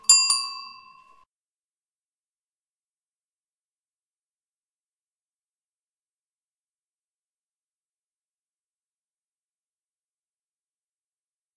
Bicycle Bell from BikeKitchen Augsburg 06
Stand-alone ringing of a bicycle bell from the self-help repair shop BikeKitchen in Augsburg, Germany
bell, bicycle, bike, cycle, mechanic, metallic, ring